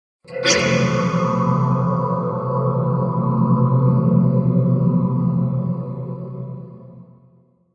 horror-effects hit suspense metal impact metallic percussion